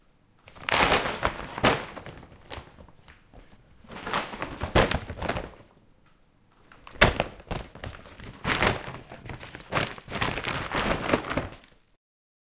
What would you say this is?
opening a plastic bag. several movements and speeds.
Recorded using Zoom H4N
plastic, opening, bag, a, household